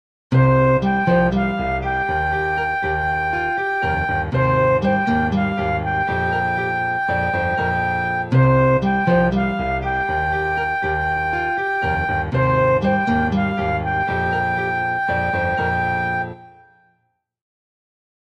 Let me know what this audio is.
Ambient Tune
An idle tune for a game
casual, fantasy, game